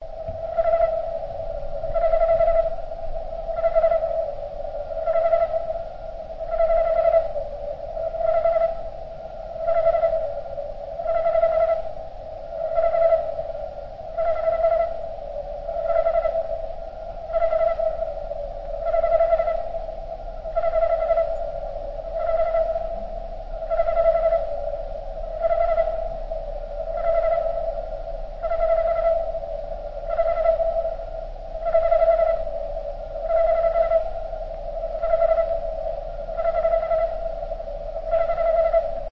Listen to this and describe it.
Sound of crickets slowed down.
A short sample of a cricket recorded in Cuernavaca, Morelos, México on summer but with time modified to go slower, so an interest different quality of sound appears.
Recorded whit a Zoom H1.
slow, night